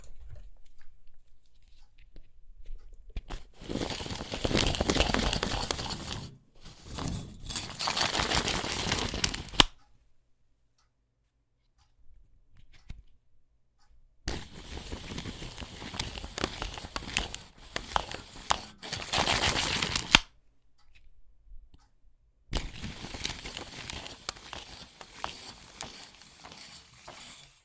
Close-up record tape measure
metal, ruler, measure